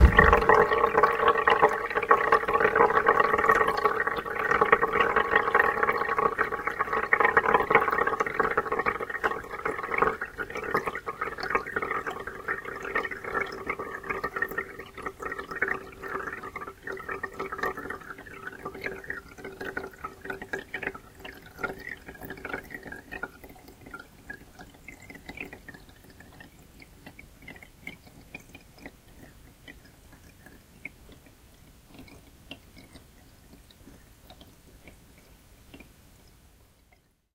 Letting water pour through a water filter.
Recorded with Zoom H2. Edited with Audacity.